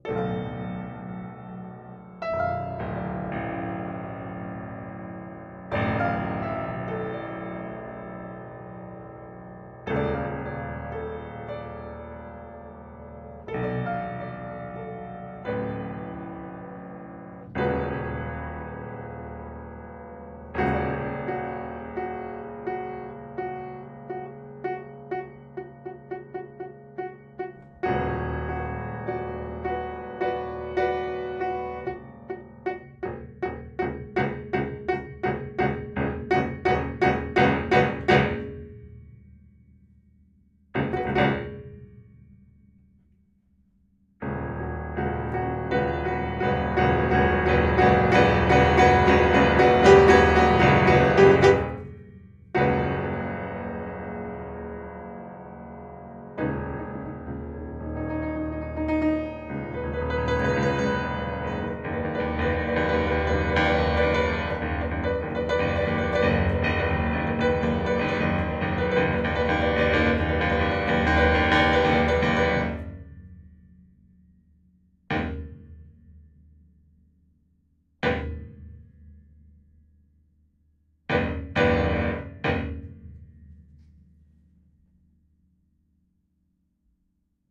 Upright Piano Dark Random 3
Playing around trying to make dark atmospheres with an upright piano. Recorded with RODE NT4 XY-stereo microphone going into MOTU Ultralite MK3.
piano, dark, experimental, upright, small-room, Rode-NT4, xy-stereo, improvisation, close-mic